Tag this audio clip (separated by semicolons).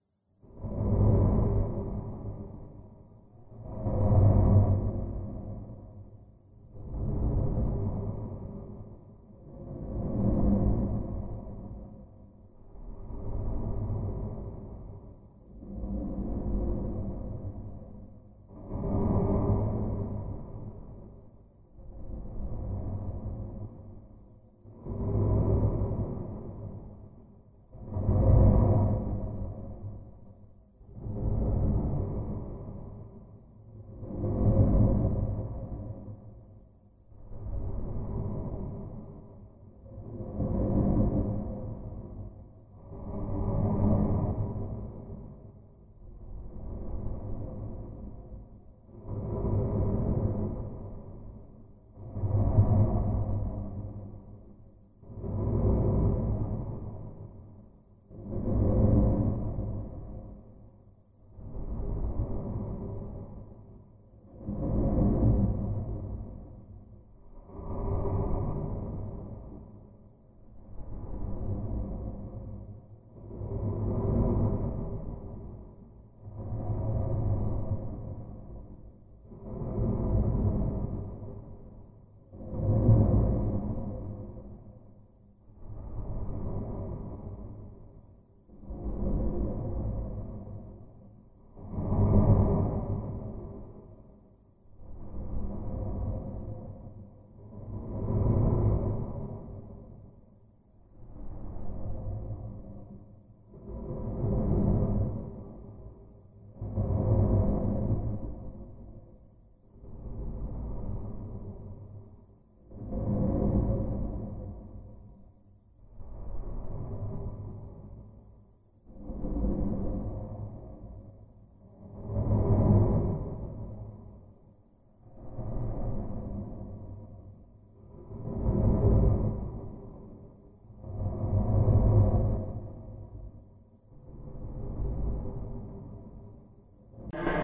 Atmosphere; Freaky; Horror; Scary; Terror